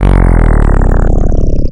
Sound effect or weird sub bass loop.
This is just a low frequency sawtooth chirp starting at 49 hz and finishing at 30.87! Creating a slide from note G to B.I then applied another sliding pitch shift down a full octave making a quick power down or stop sound. 1 bar in length, loops at 140bpm